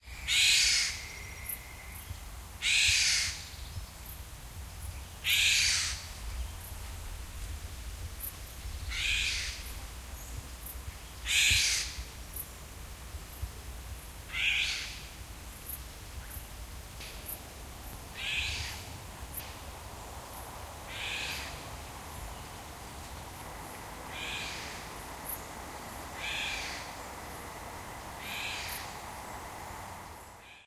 oiseau+train pisseloup
A strange bird in a forest near Paris. I've not seen it - just heard it. Very calm ambiance of a winter evening, with a train in the background. Does anyone knows what is this bird ?
(2 AT3031 + homemade preamp + sharp MD-MT80)
winter, train, field-recording, nature, ambiance, north-france, bird